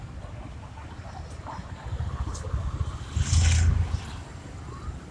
Registro de paisaje sonoro para el proyecto SIAS UAN en la ciudad de Palmira.
registro realizado como Toma No 07-ambiente 2 parque de los bomberos.
Registro realizado por Juan Carlos Floyd Llanos con un Iphone 6 entre las 11:30 am y 12:00m el dia 21 de noviembre de 2.019
02-ambiente, 2, No, Of, Paisaje, Palmira, Proyect, SIAS, Sonoro, Sounds, Soundscape, Toma